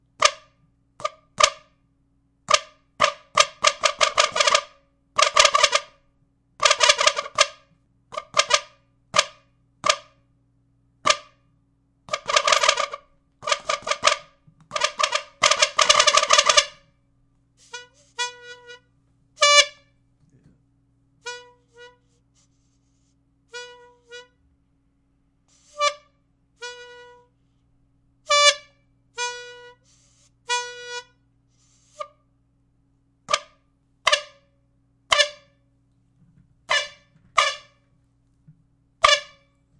Bike Horn play
Shit's like a flocka retarded geese out on the playa.
stick, foxes, together, burning, geese, man, bike, horn